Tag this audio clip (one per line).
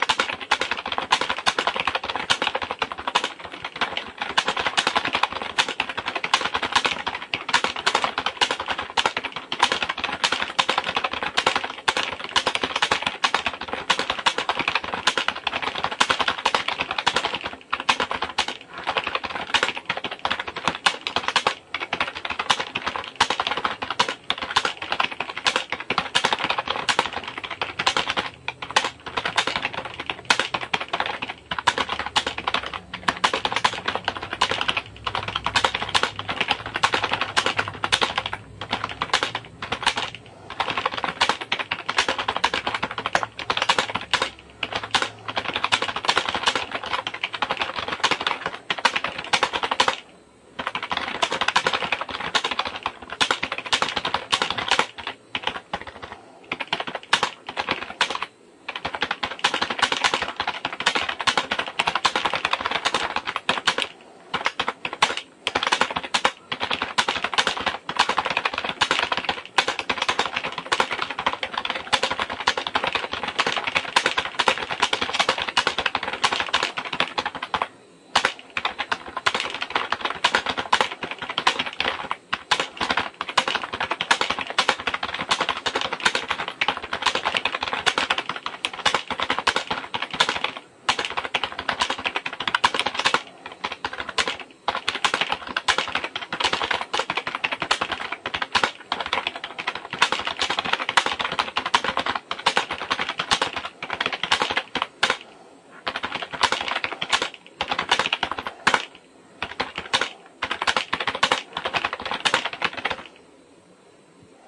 computer
keyboard
loud
typing